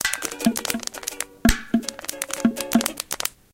soundscape HD Tom&Jacob
Tom & Jacob made this Soundscape using their own mySounds and the mySound from David & Judith in Barcelona and Segyid in Belgium.
cityrings, humphry-davy, Jacob, soundscape, Tom, UK